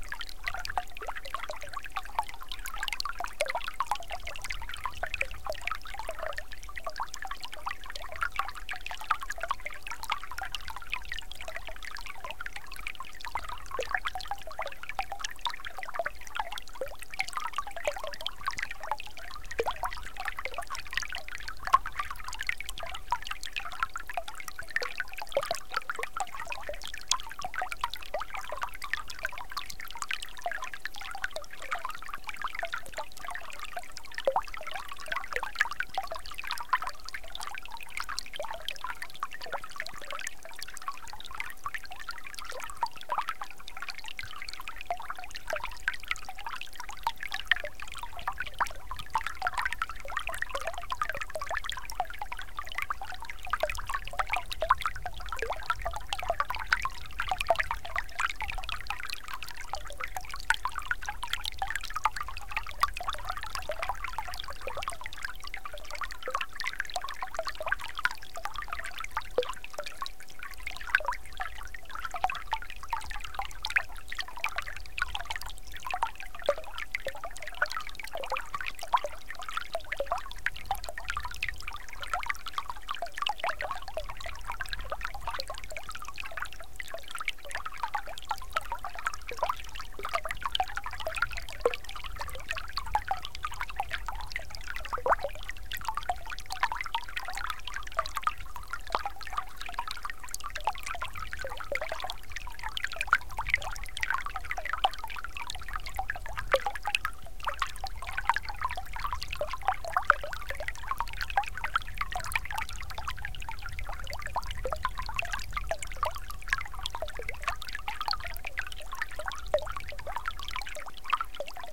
national water 05
One in a series of recordings of a small stream that flows into the Colorado River somewhere deep in the Grand Canyon. This series is all the same stream but recorded in various places where the sound was different and interesting.
field-recording, noise, flow, river, loop, water, dribble, relaxation, ambient, trickle, relaxing, stream